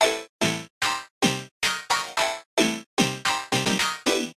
Sweet house piano